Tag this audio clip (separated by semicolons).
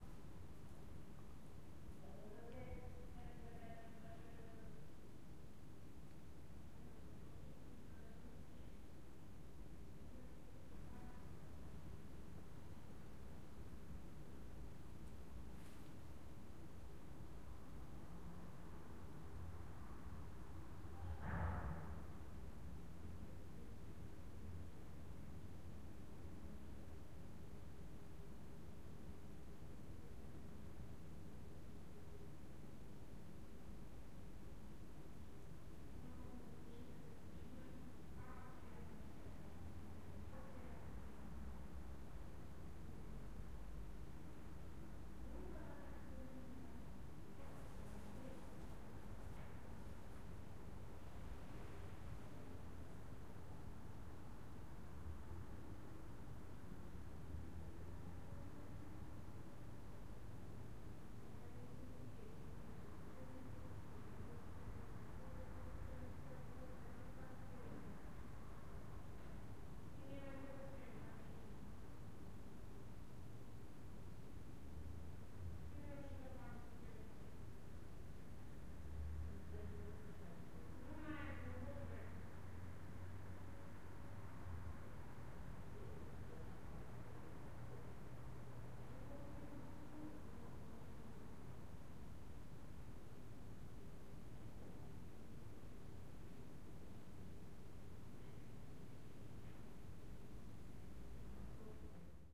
ambient; neighbours; room